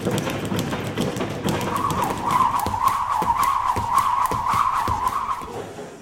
academia box pulando corda
academia, box, pulando corda, gym